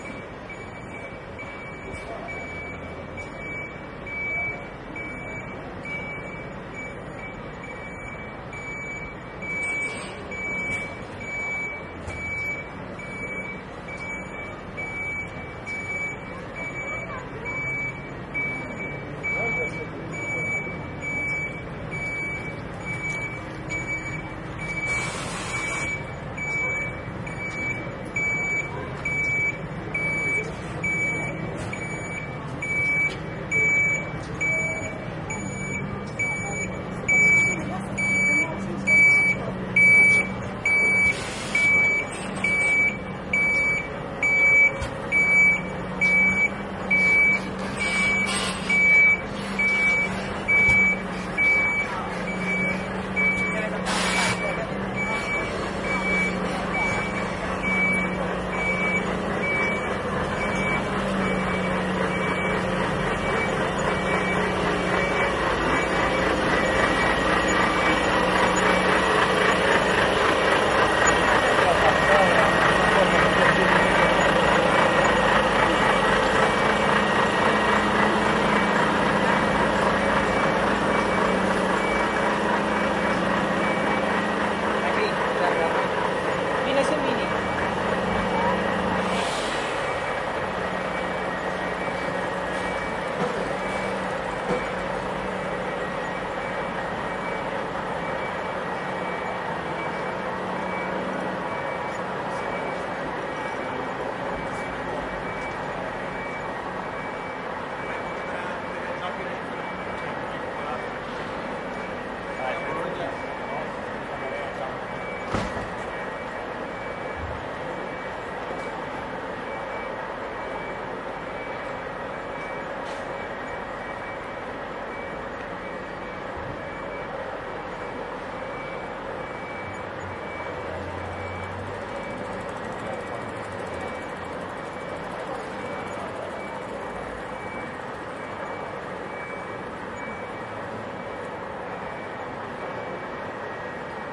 170719 Stockholm RiddargatanTruck R
A construction truck is slowly reversing off of the Riddargatan into the Nybrogatan in Stockholm/Sweden. It is a sunny morning and there is a fair amount of pedestrian traffic underway. The truck is driving right by the front of the recorder from right to left, it's reversing safety beeper predominant in the beginning, it's motor predominant at the end of the recording.
Recorded with a Zoom H2N. These are the REAR channels of a 4ch surround recording. Mics set to 120° dispersion.
ambience, beep, city, construction, Europe, field-recording, loud, people, Stockholm, street, surround, Sweden, traffic, truck, urban